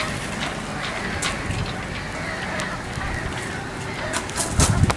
Seagulls and Rigging Sounds
Sea gulls crying calls. Wind slapping rigging against ship masts, tools running in the distance.
Recorded at Fambridge Yacht Haven, Essex using a Canon D550 camera.
power-tools, rigging, rhythm, sea, field-recording, repeat, boat, wind, song, marine, yaght, ship, slap, bird, mast, yard